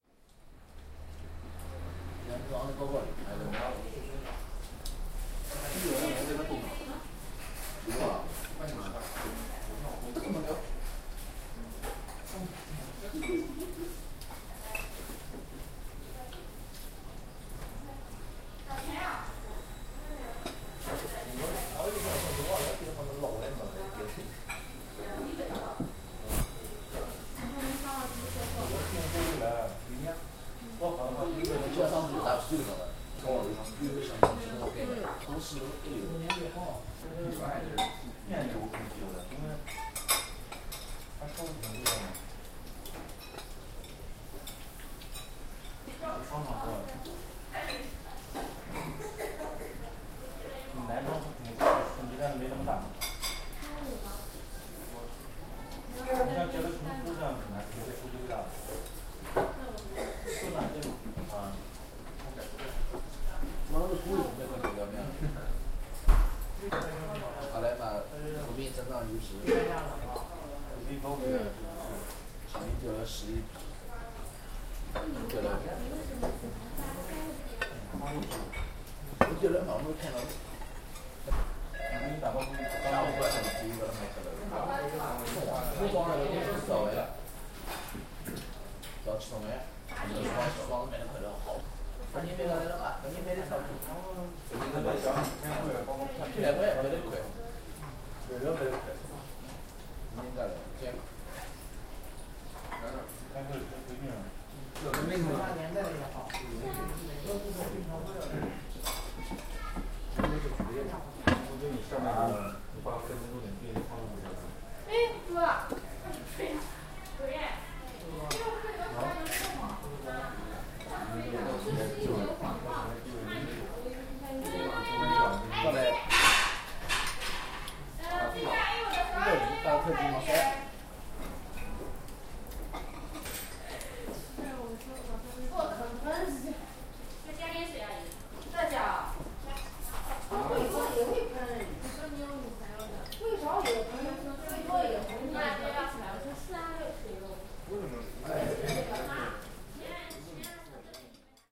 Ambiance of a Chinese restaurant in Shanghai